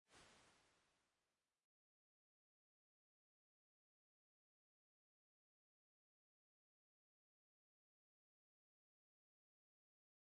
QV Room dec50 diff5
IRs from my hardware Quadraverb reverb from 1989.